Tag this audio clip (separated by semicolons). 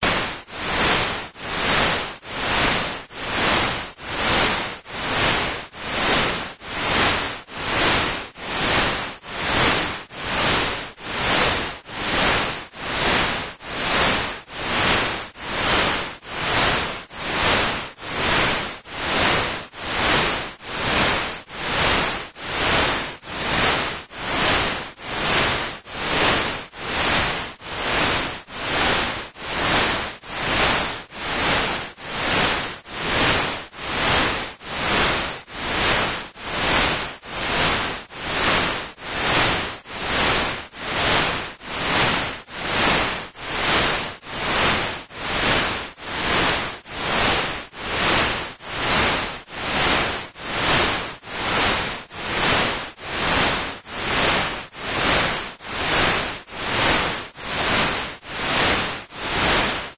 bianural; wave; beat; delta; alpha; gamma; brain